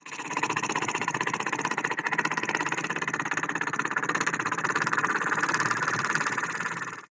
Sonido de un helicoptero.